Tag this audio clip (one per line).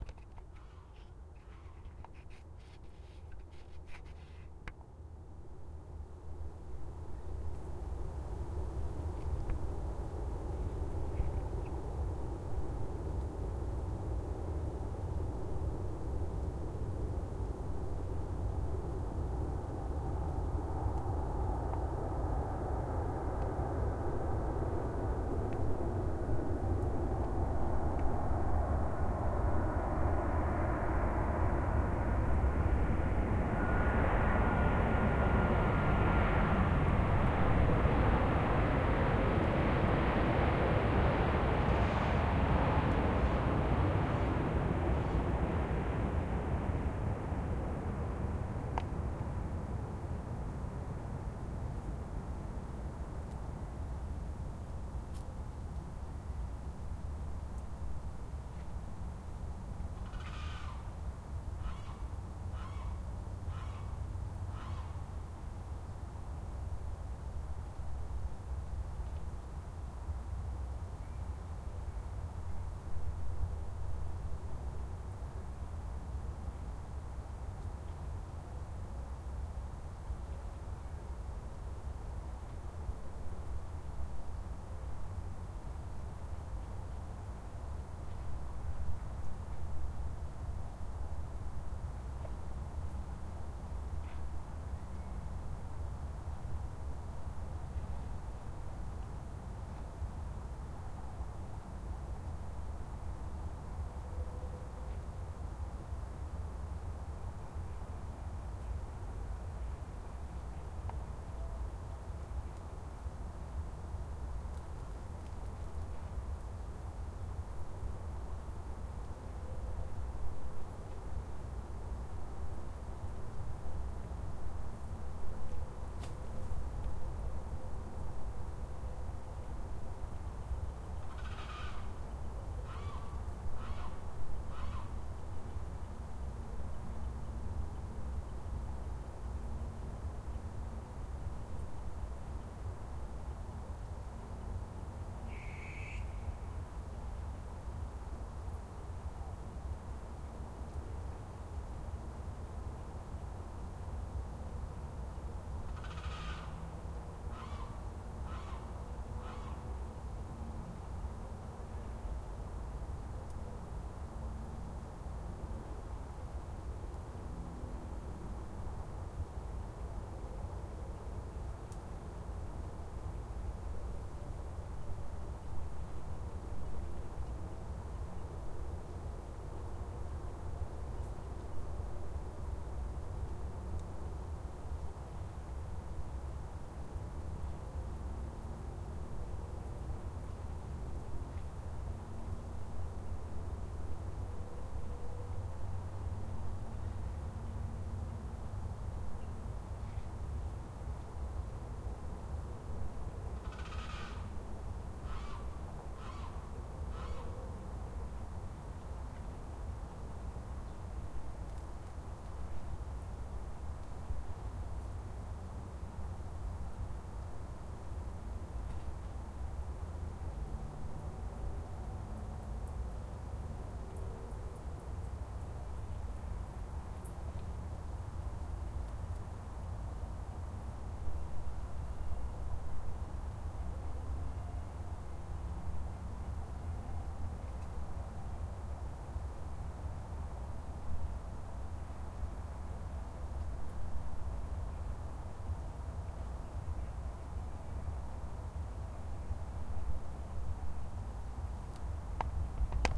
aires
birds
buenos
city
pantano